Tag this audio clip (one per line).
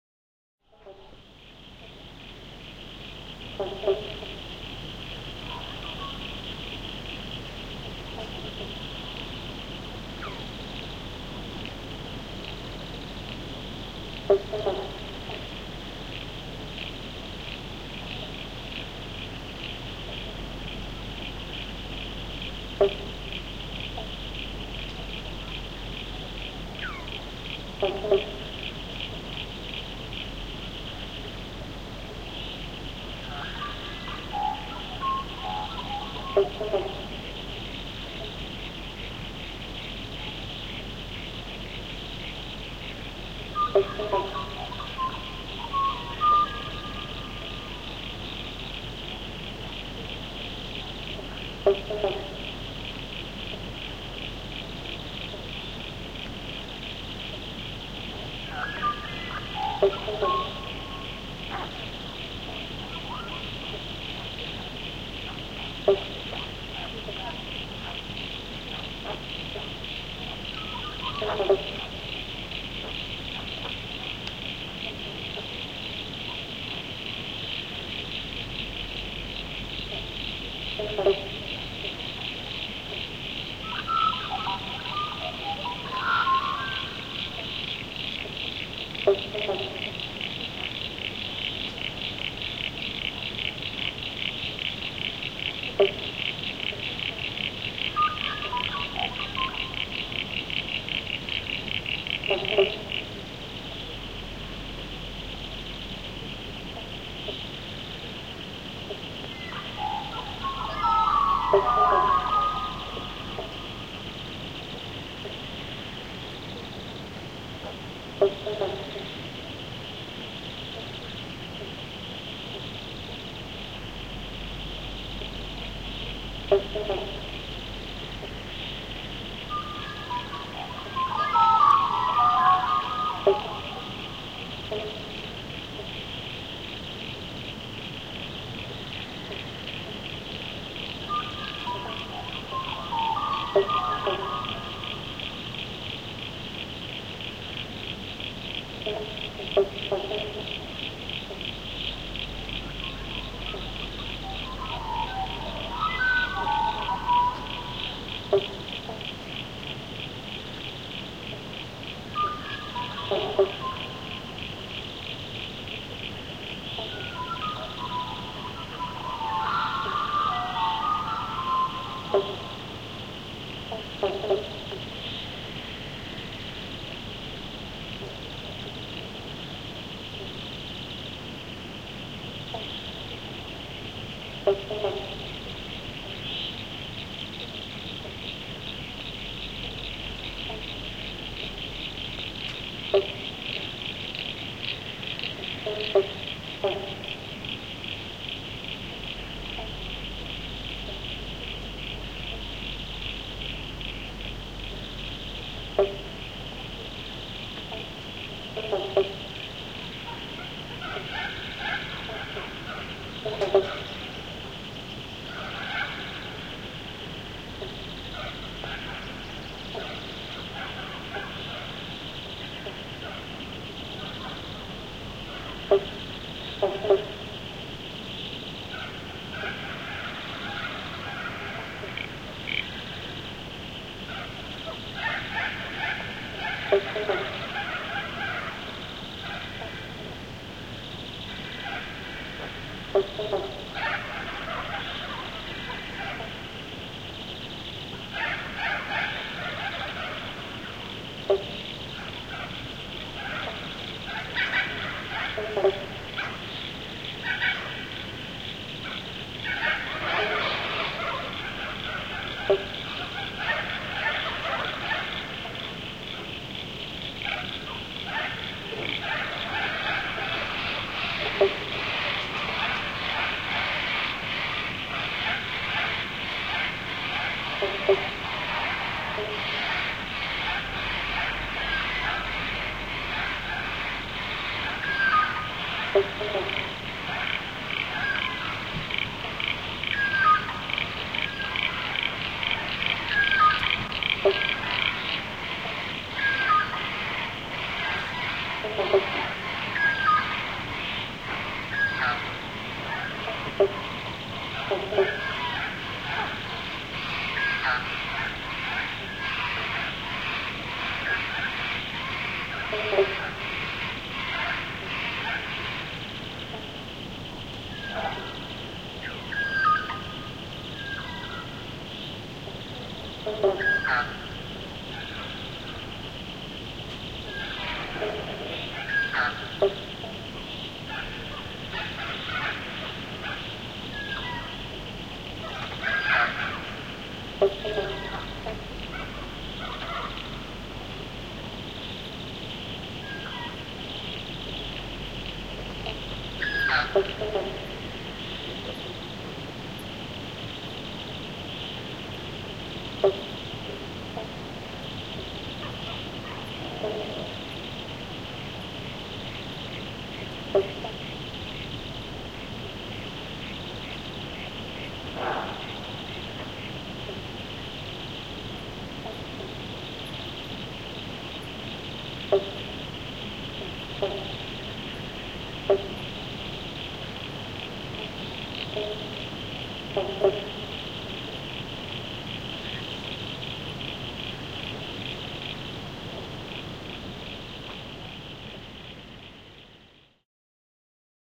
ambiance
forest
evening
nature
corella
frog
magpie
ambient
insects
birds
field-recording
Australian-bush